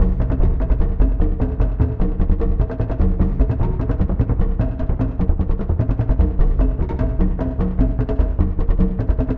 forest drum sound
I created this sound now it is yours!
drums, drum, loop, jungle, forest